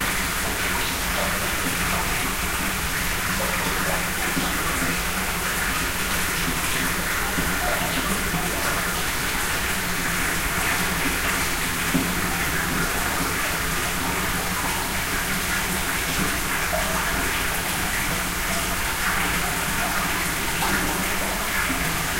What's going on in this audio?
Nolde Forest - Water In Spring House
Water flowing inside a spring house along the Watershed Trail in Nolde Forest, Mohnton, PA.
Recorded with a Tascam PR-10.
field-recording, flowing, nolde-forest, spring, water